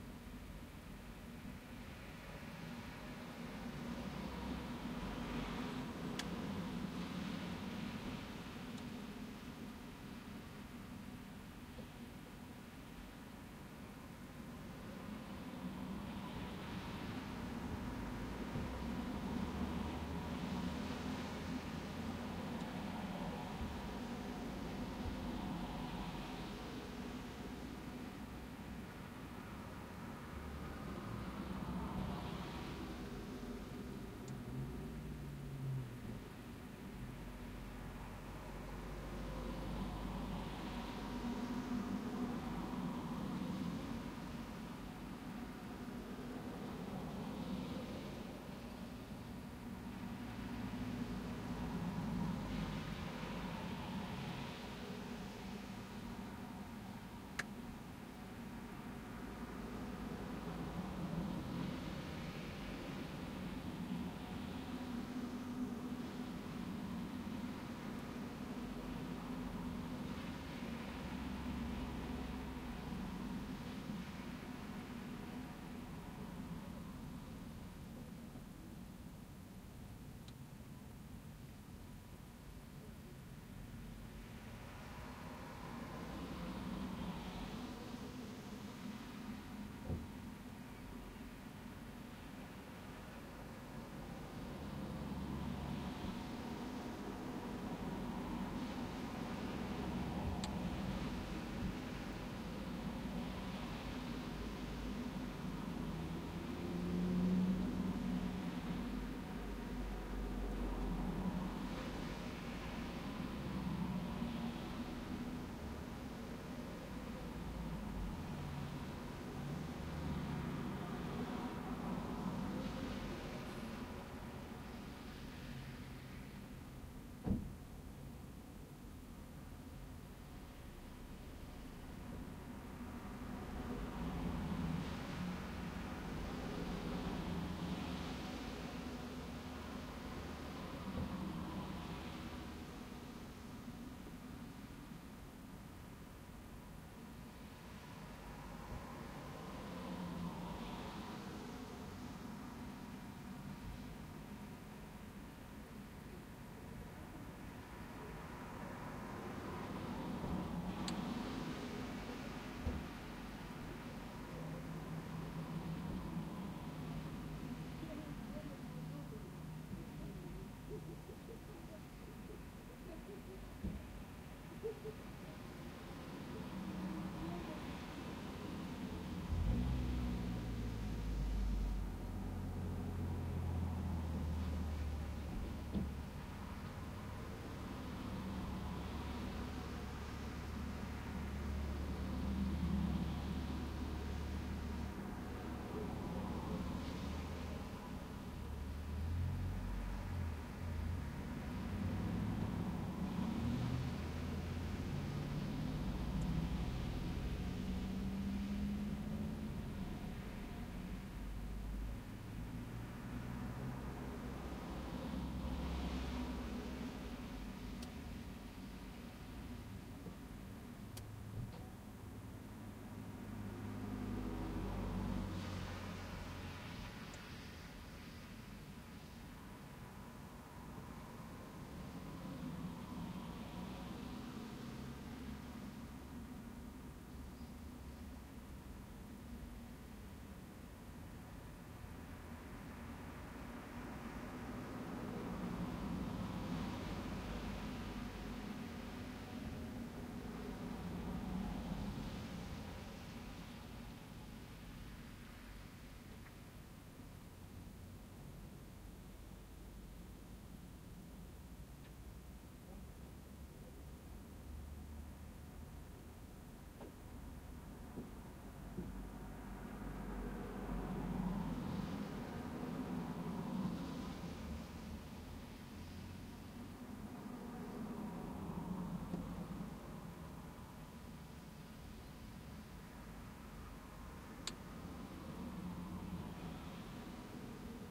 smalltown-ambience
A recording outside of a store in a small city, you can hear cars passing by mostly but also people from time to time. Recorded with Zoom h1n from inside my car.
ambience, ambient, atmospheric, background-sound, car, cars, field-recording, soundscape, store